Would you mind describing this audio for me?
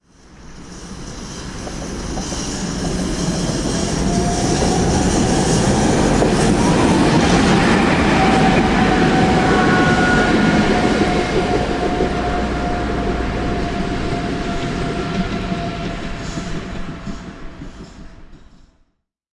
Train in the city